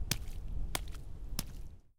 Agua Chapoteo Charco
Sound stepping on a puddle formed repeatedly.
upf-cs13, water